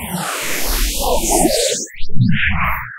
[AudioPaint] polymobile
Created with AudioPaint from old colorized photo of my former cell phone keyboard.